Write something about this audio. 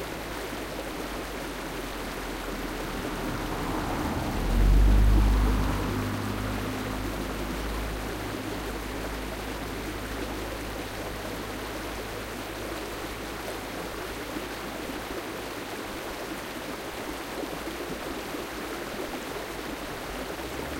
Recording a stream (front down) by the side of the road in a small valley, a car passes slowly behind.
DIY dummy head, Quad capture. Details as rest in pack DW